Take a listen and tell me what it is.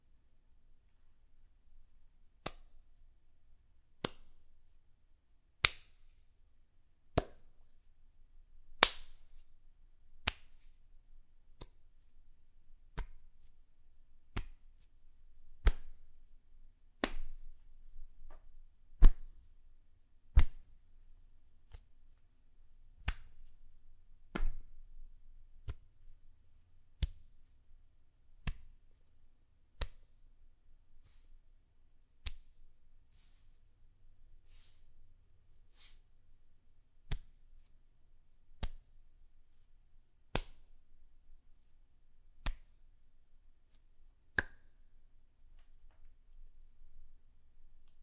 Various sounds of gentle skin on skin interactions such as grabbing, slight slapping, patting, rubbing, etc.
arm, smack, rub, pat, hand, skin, grab, slap